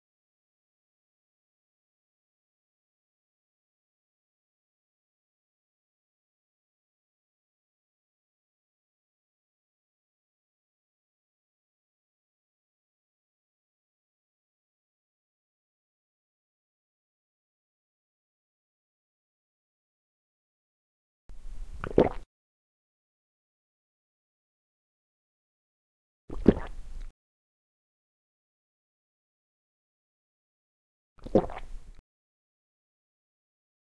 When you get a big gulp.